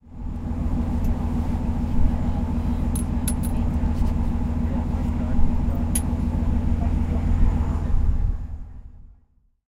A very short clip in which you can hear the engine of an old fashion Norwegian steamboat. Had to cut the clip short since it was windy.